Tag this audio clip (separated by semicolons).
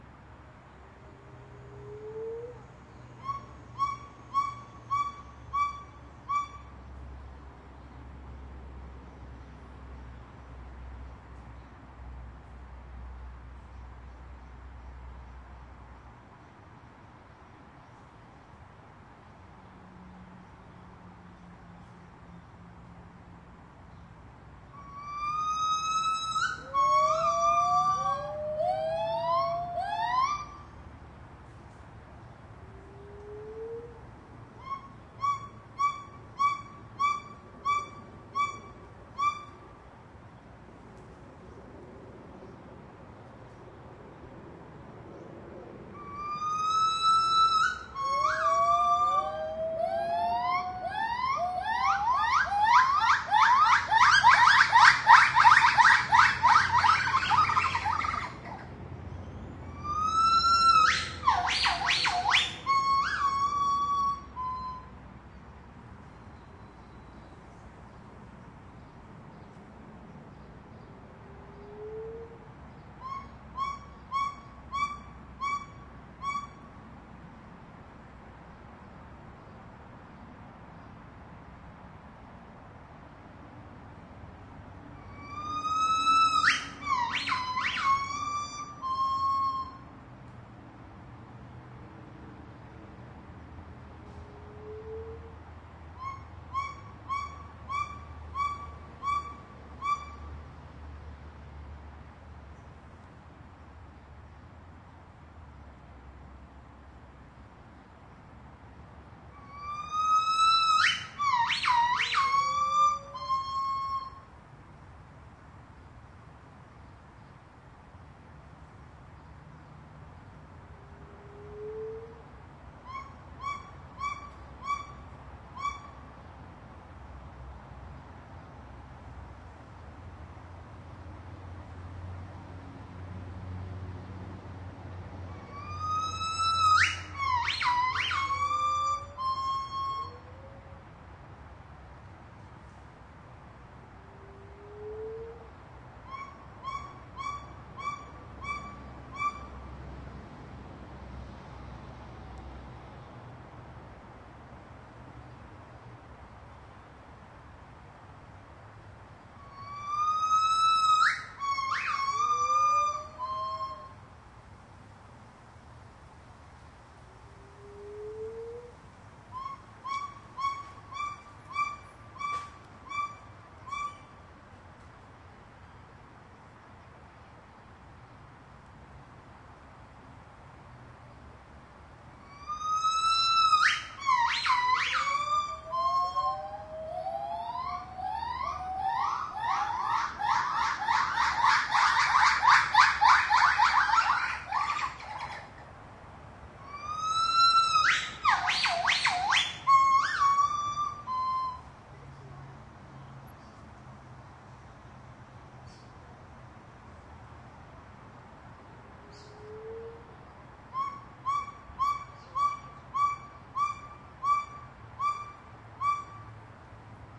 asia; monkey; gibbon; primate; zoo; field-recording